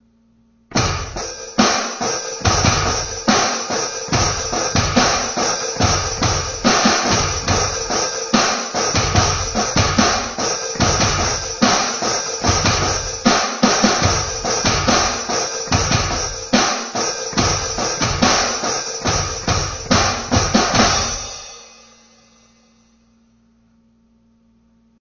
ELECTRONIC MONSTER BEAT
I recorded this on my Roland electronic patch 'Monster' right off the Roland cube monitors to my Yamaha Pocketrak recorder. Thanks. :^)
Beat, Drums, Electronic, Rock, Roland, TD7